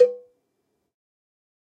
CAUTION: THIS PACK IS A CHEAP HOME RECORD. (But this one sounds a bit better)